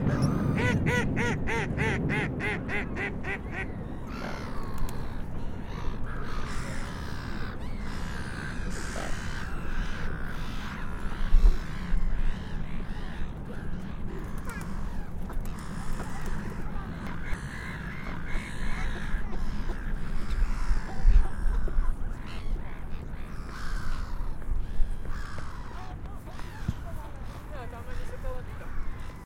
city river
city, river, water